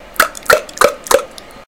hollow clop
clop, dare-19